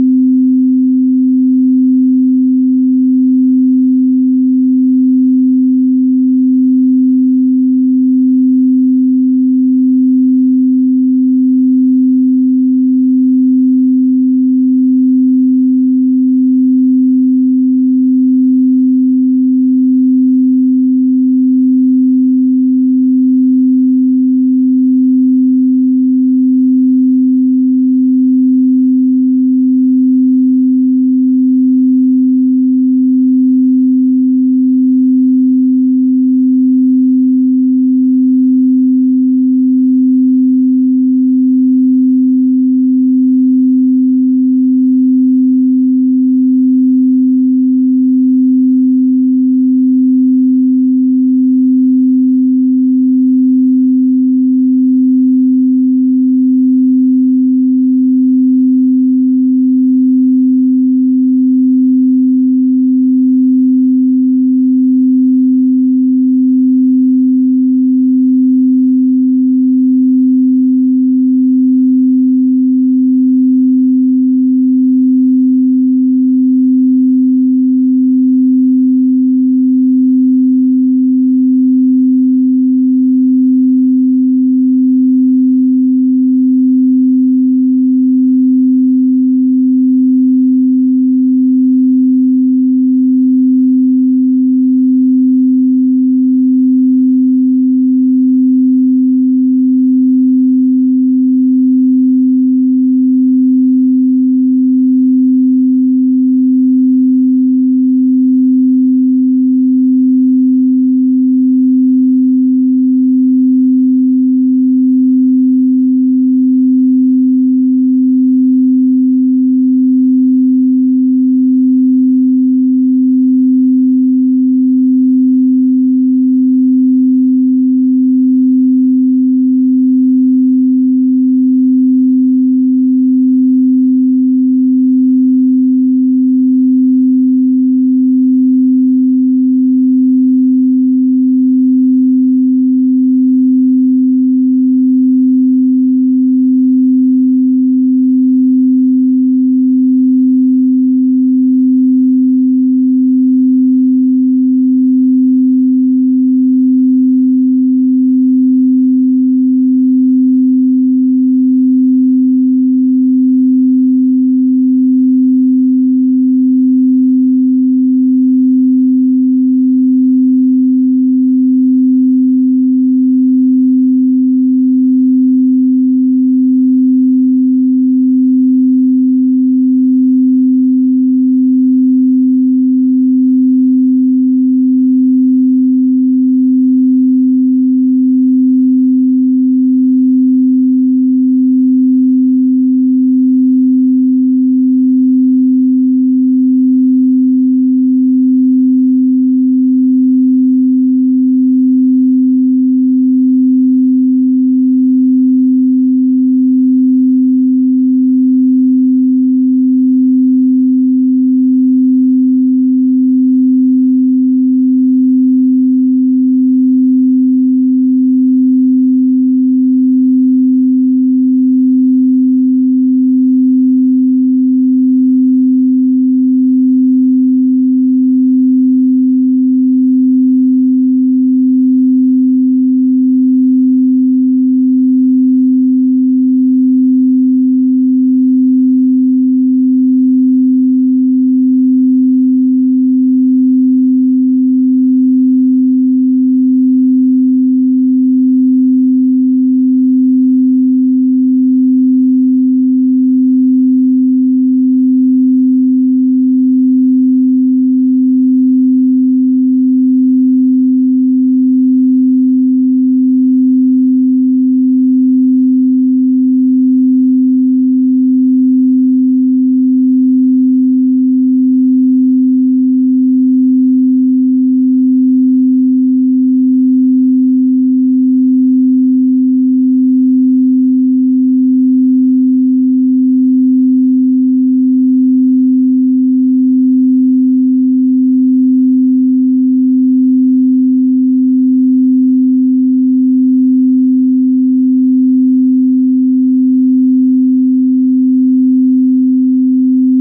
258Hz Solfeggio Frequency - Pure Sine Wave - 3D Spin
May be someone will find it useful as part of their creative work :)
3d, Pythagoras, aum, buddhist, frequency, gregorian, om, ring-tone, solfeggio, tibetan, tone